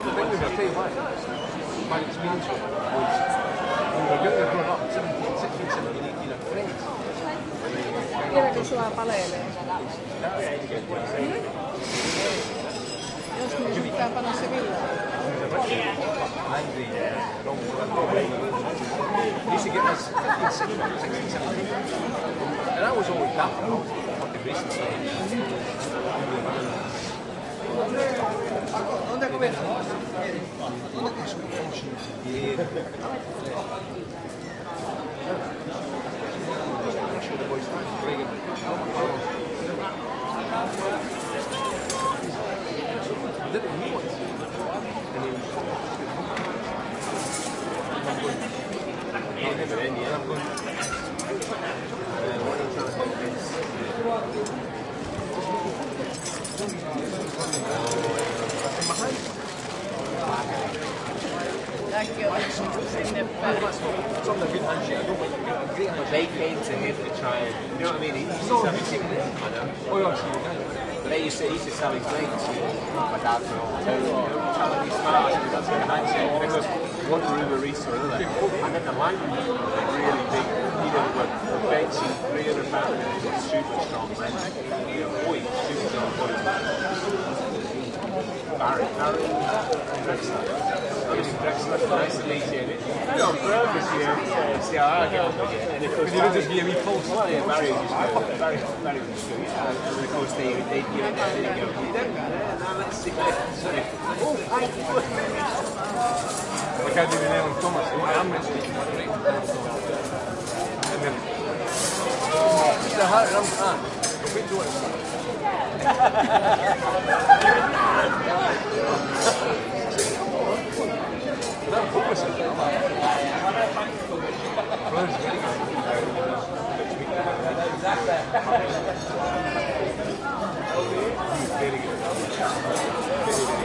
Lunch in restaurant located in placa Reial Barcelona.Recorded with Zoom H 2 recorder 2.3.2008